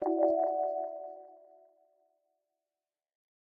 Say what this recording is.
ui sound 3
subtly discordant notifying set of harmonies. inspired partly by the wii
application, chime, computer, error, harmonic, interface, menu, option, question, ui, warning